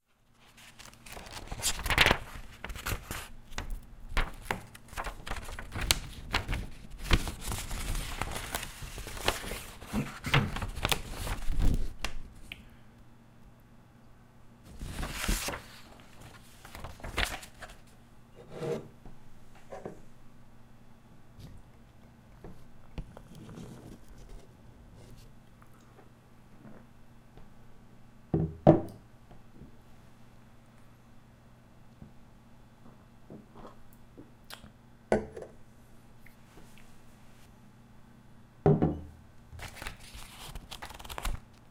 domestic sounds 1
Domestic sounds regarding some papers and drinking coffee made at my home in Flanders Belgium in 2008 with a Digital Field Recorder.
coffee, drinking, papers, reading, some